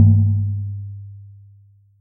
primitive faux drum
risset, drum, audacity